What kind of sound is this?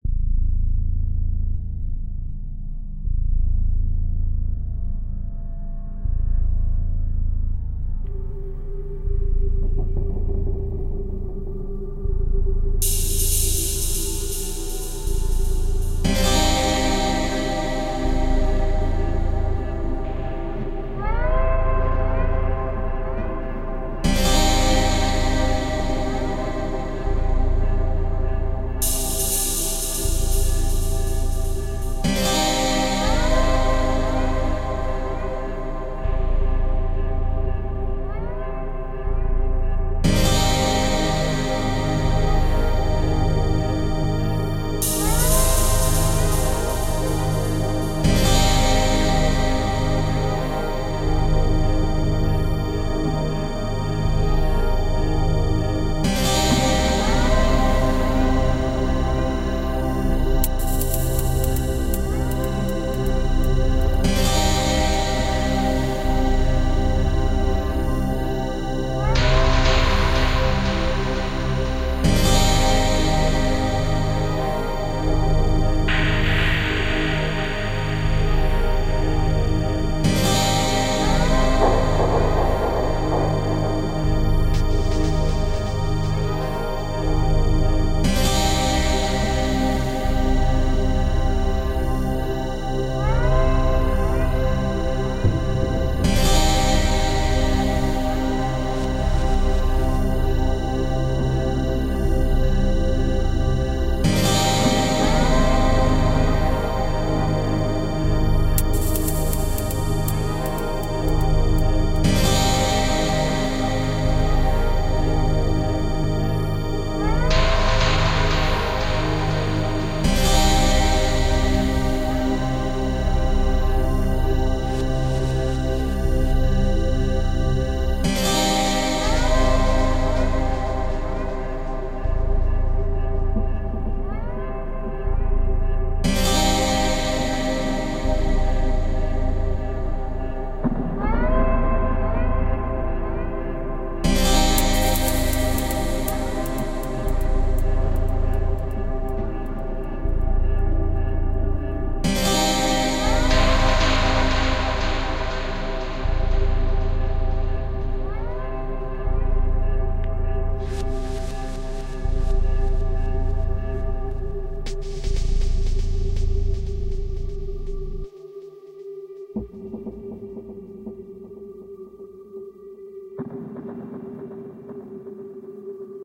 Cinematic guitar loop and fx-
Synth:Abletonlive,kontakt, Reason.
rhythmic, loop, electronic, ambience, sound, music, synth, track, noise, ambient, 120bpm, original, atmosphere, guitar, ambiance